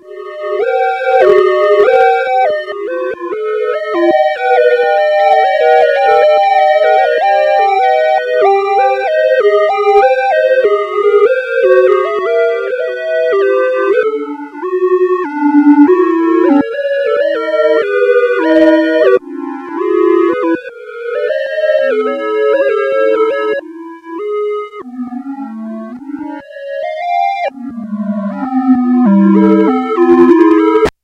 alien siren
Casio CA110 circuit bent and fed into mic input on Mac. Trimmed with Audacity. No effects.
Bent, Casio, Circuit, Hooter, Table